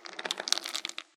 Foley for a bug scurrying along rocks in a glass tank. Higher pitched impact.
Made by shaking a plastic jar of almonds.